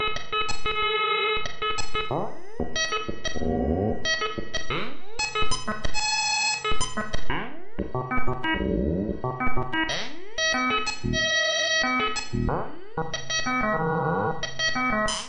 A sample of some kind (cannot recall) run through the DFX scrubby and DFX buffer override plugins
melody, glitch, dfx